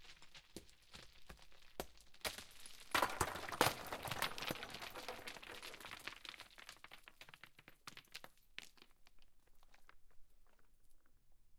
some small and large stones falling down a hill, very glassy sound